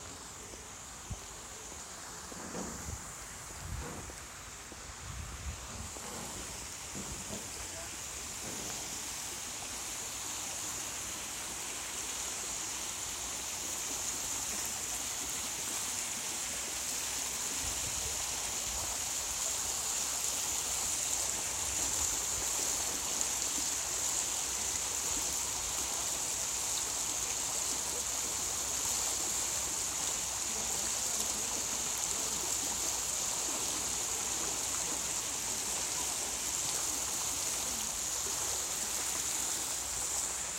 Fountain Atmosphere
Sound of a fountain flowing
Atmosphere, Fountain, Water